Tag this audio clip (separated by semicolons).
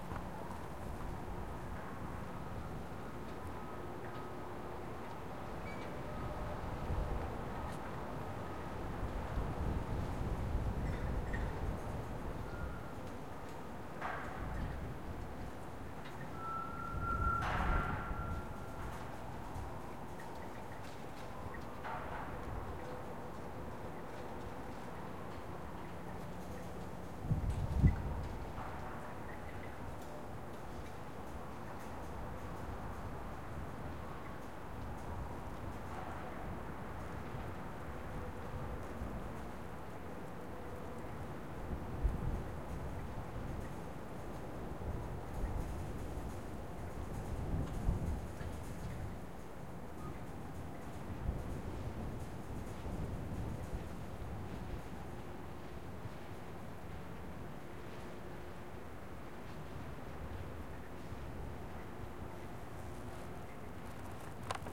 blow; waving; crane; howling; windy; construction; yard; wind; squeaky; blowing; city; site; iron